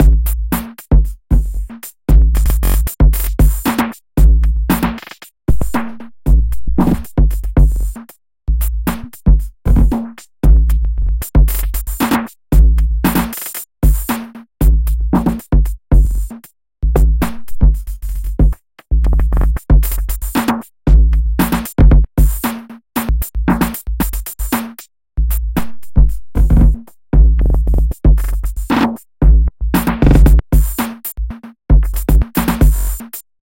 electronic drumloop with a deep kickdrum created with Reaktor 5 and Ozone 3 at 115 Bpm